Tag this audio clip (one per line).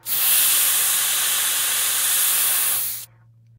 blowing
wind
blow
hiss
air